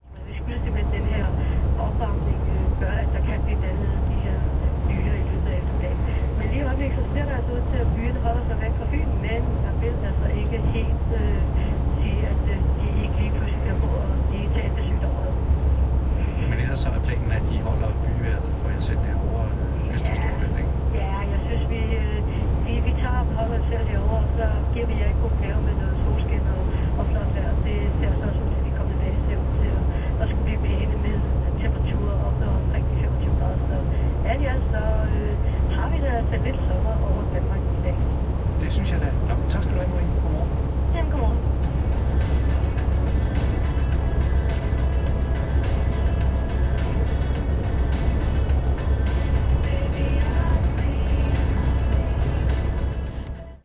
110801-danish radio1
01.08.11: the second day of my research on truck drivers culture. Inside the truck cab during road. Somewhere in Denmark on the motorway. Sounds of Danish radio (talks, music),engine in the backround.ambience.
field-recording, danish, truck, cab